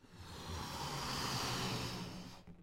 These were made for a special kit i needed for school. They are the inspired by "in just" by e.e. cummings.
balloon, inflating